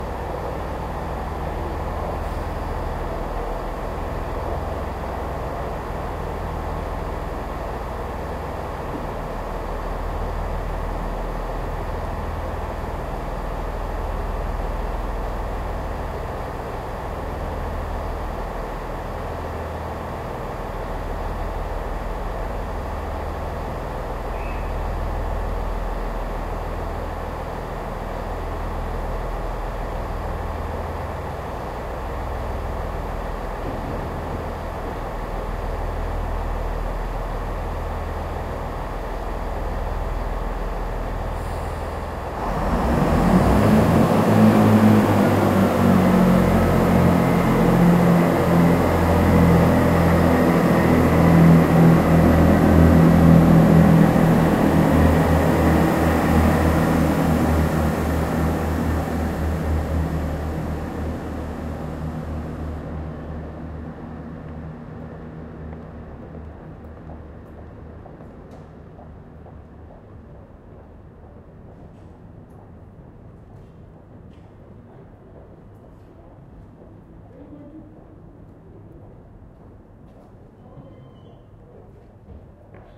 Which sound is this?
Train waiting
The recording starts with the sound of a diesel train wiating on a distant platform. The train then moves off. You can then hear people walking, voices and other noises on the station.
Recorded Sep 2011 at Slough train station, UK with a Zoom H1 using the built in microphones.
train-station, stopped, train, diesel, diesel-train, Slough, moving-off, ambience